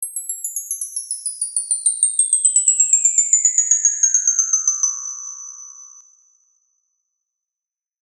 Bar Chimes V2 - Aluminium 8mm - wind
Recording of chimes by request for Karlhungus
Microphones:
Beyerdynamic M58
Clock Audio C 009E-RF
Focusrite Scarllet 2i2 interface
Audacity
bar, bell, chime, chimes, chiming, glissando, metal, orchestral, percussion, ring, wind-chimes, windchimes